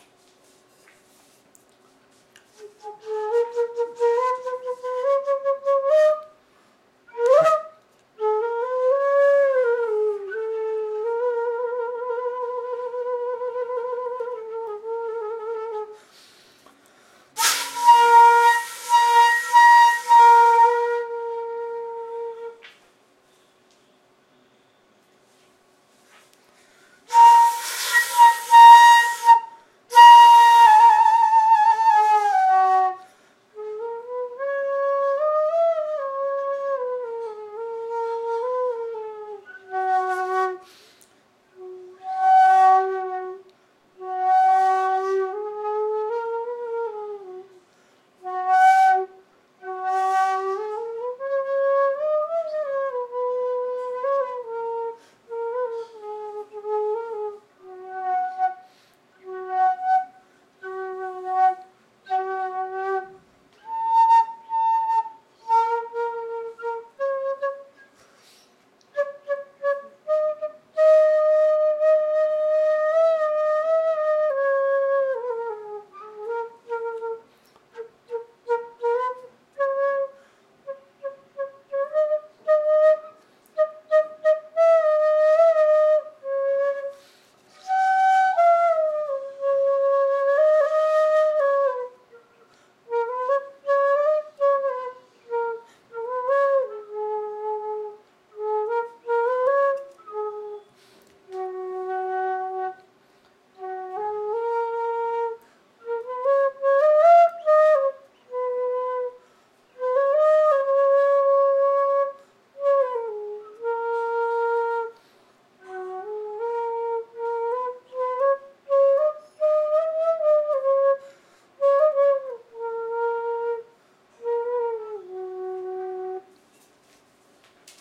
I recorded this Indian bamboo flute as a part of a sound check.